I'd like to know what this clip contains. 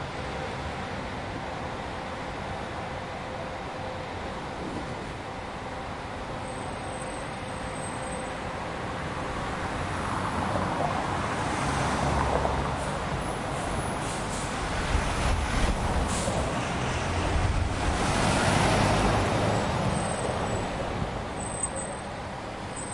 Bus leaving and passing cars